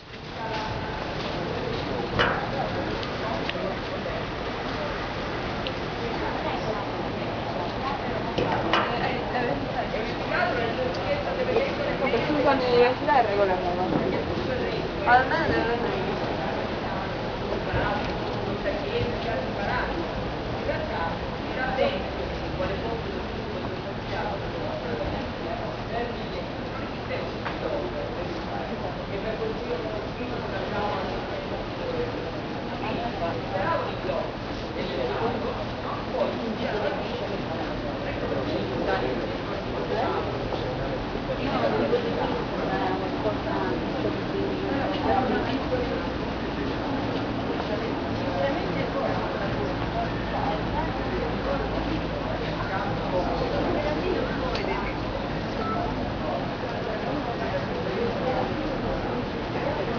ambience in bars, restaurants and cafés in Puglia, Southern Italy. recorded on a Canon SX110, Lecce